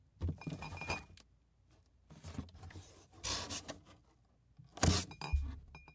low pitched box movement, high pitched glass tinging, sliding box, glass-on-glass bumping